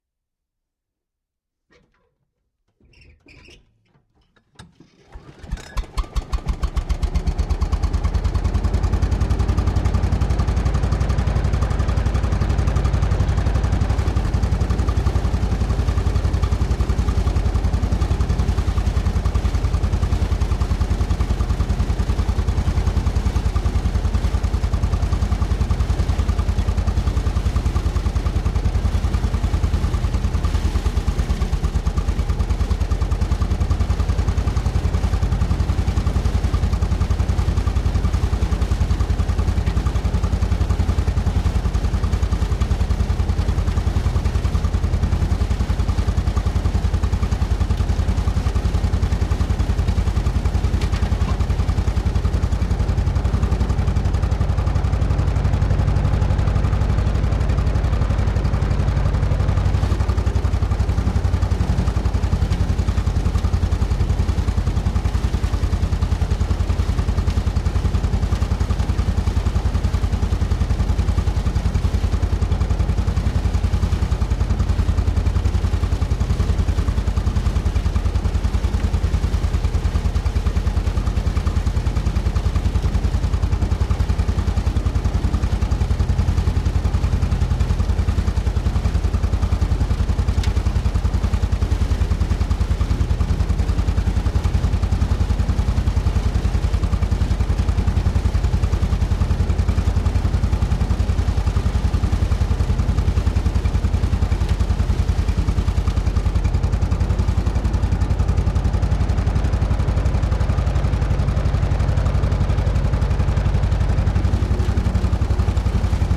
lawn mower engine onboard on off mowing tall grass 2 mono
This sound effect was recorded with high quality sound equipment and comes from a sound library called Lawn Mower which is pack of 63 high quality audio files with a total length of 64 minutes. In this library you'll find recordings different lawn mowers, including electric and gas engine ones.
cut, cutting, down, effect, engine, grass, lawn, mechanical, mower, mowing, off, onboard, shut, sound, trim, trimming, turn